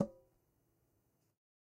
Metal Timbale closed 003
closed, conga, god, home, real, record, trash